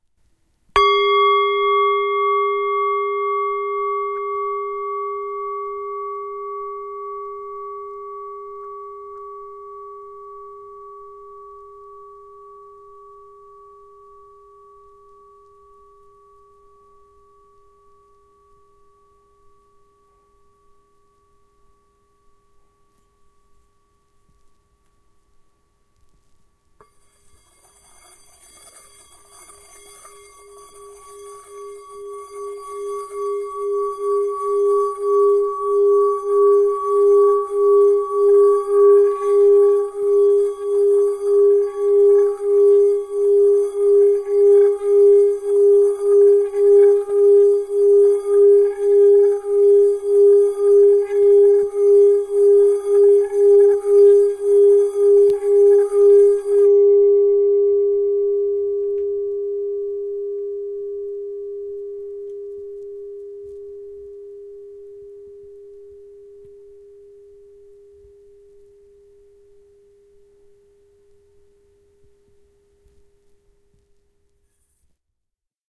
Pentatonic Bowl #3 Sample 2
Pack Contains:
Two 'drones' on a 11 inch diameter etched G2 pitch Himalayan bowl; a shorter drone on the bass and a longer drone with both bass and first overtone. Droning done by myself in my home.
Also contains pitch samples of a 5 bowl pentatonic scale singing bowl set of old 'cup' thado bowls, assembled by myself. Each sample contains both a struck note and a droned note. Some bowls have more than one sample for no particular reason. All performed by myself.